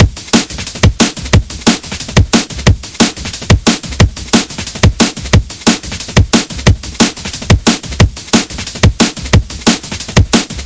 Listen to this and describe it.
True Ghost Break 2 (180 BPM)
These are the break I layered out and create. Here's my break Folder!
If link not work it's either traffic or it wont through it. Sory for the misleading tags and not grammar.
4x4-Records, Bass, Clap, Closed, Dance, Drum, Drums, EDM, Electric, Hi-Hats, House, J-Lee, Kick, Loop, Music, Off-Shot-Records, Sample, Snare, Stab, Synthesizer